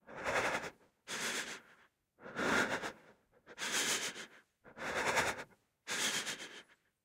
Male Breath Scared Frozen Loop Stereo
Scared or Frozen Breath of a Human (Loop, Male).
Gears: Rode NT4